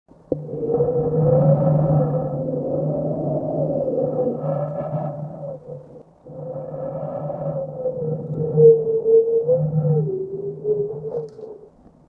Sound of breathing over a plastic bottle. Recorded on a Marantz PMD661 with a shotgun mic.
wind, breath, experimental, bottle